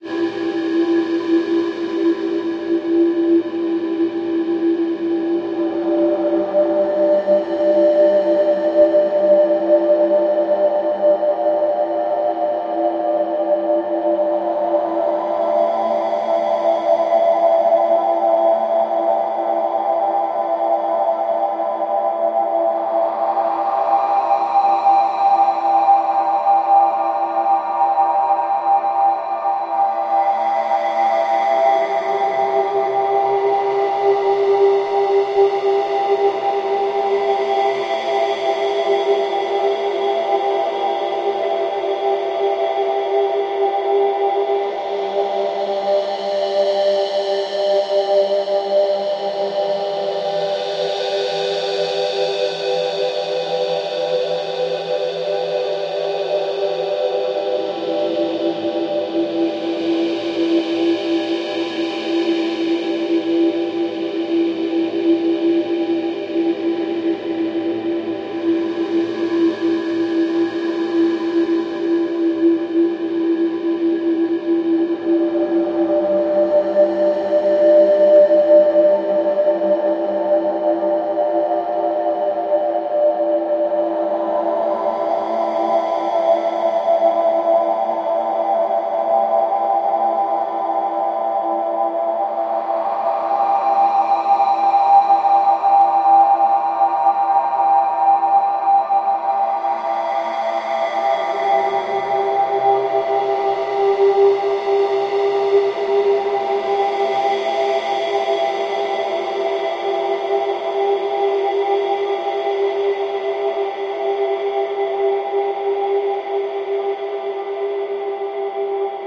Ambient Wave 7
This sound or sounds was created through the help of VST's, time shifting, parametric EQ, cutting, sampling, layering and many other methods of sound manipulation.
Any amount donated is greatly appreciated and words can't show how much I appreciate you. Thank you for reading.
abcel, awesome, beautiful, chords, cool, cuts, edits, extreme, game, loading, long, longer, loop, loud, lovely, music, pauls, processed, project, reverb, reverbed, samples, screen, sounds, stretched